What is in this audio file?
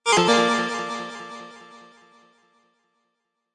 Retro Game Sounds SFX 22
shoot, gamesound, audio, pickup, sfx, retro, game